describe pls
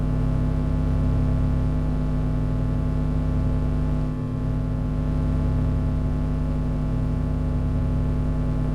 SpaceEngine ControlRoom Loop 01
An electric space engine control room looping sound to be used in sci-fi games, or similar futuristic sounding games. Useful as background noise on the bridge of the ship to emphasize that serious calculations are being made to keep everything running smoothly.